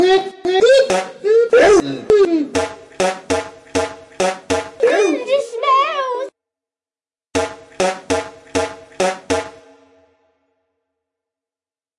in progress 2
A fun piece to listen to. This childish track (that´s why it´s made by me!) was made with Yellofier on my Ipad.
fart, fun, funny, music, yellofier